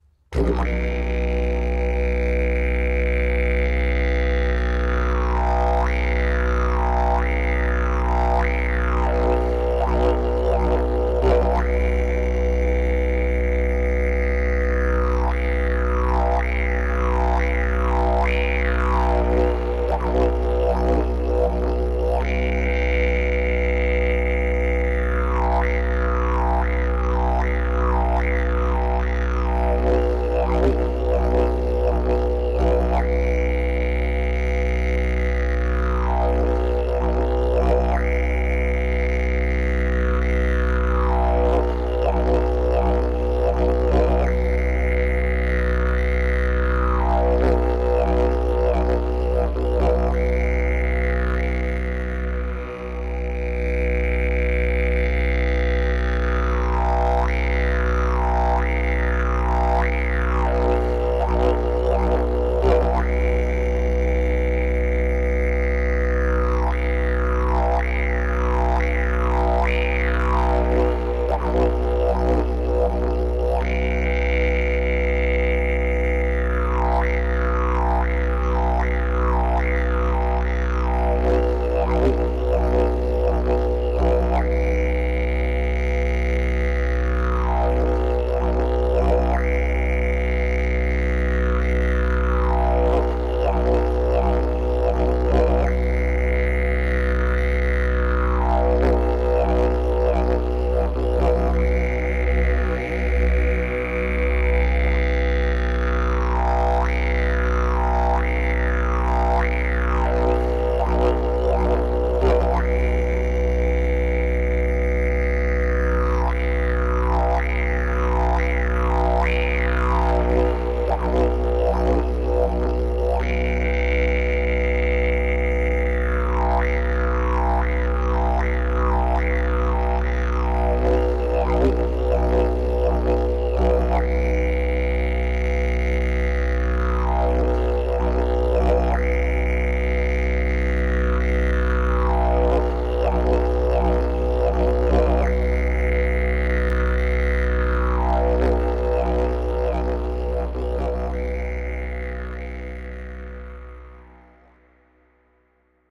Didge for anything
Didgeridoo sample for anyone to use, played by me on a Didjeribone tunable PVC Didgeridoo made of two sliding parts for pitch accuracy...recorded on a Zoom H2 at FG Studios Australia
aboriginal, ancient, australia, didgeridoo, drone, harmonics, pipe, primal